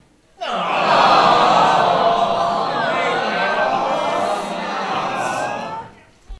Disappointed Crowd
Recorded with Sony HXR-MC50U Camcorder with an audience of about 40.